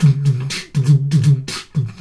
Loop2 009 Nasal (120bpm)
I recorded myself beatboxing with my Zoom H1 in my bathroom (for extra bass)
This is a nasal beat at 120bpm.